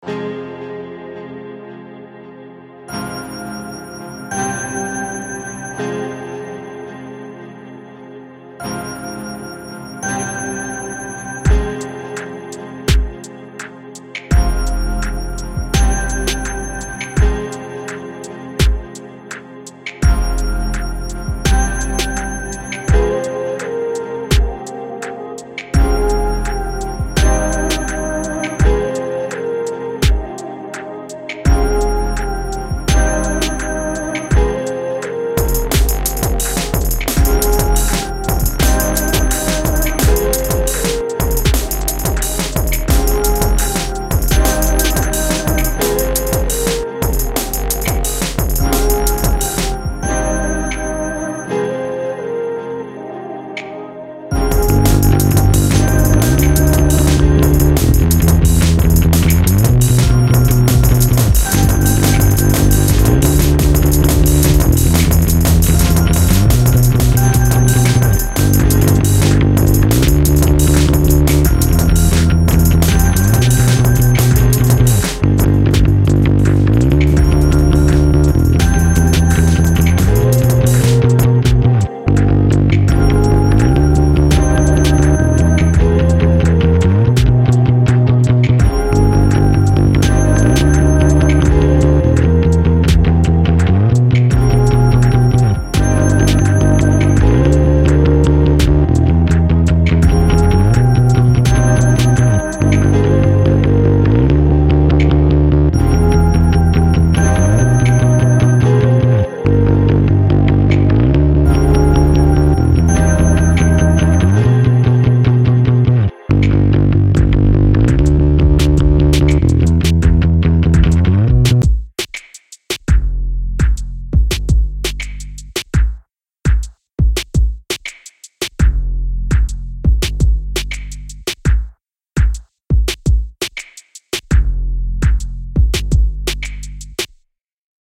Content warning
experimental, amphora, Thalamus-Lab, multisample, ceramics, 1-shot